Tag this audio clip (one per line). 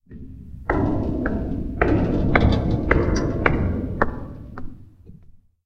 bassy
floor
foot
footsteps
ground
heavy
iron
metal
metallic
muffled
stepping
steps
walking